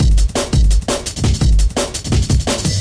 drum n bass loop